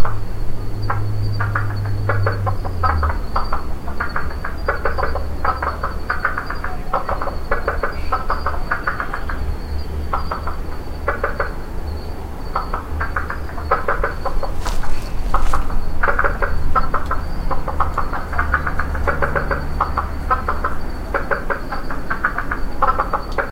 An army of Frogs in the pond in my backyard
Frog-Army, Frog-Group, Frogs